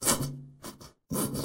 EMPTY DIFFERENT TUBES WITH SOAP SHAMPOO OR JELLY